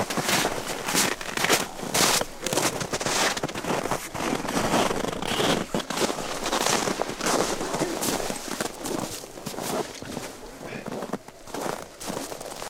footsteps boots crunchy snow nice
snow, boots, crunchy, footsteps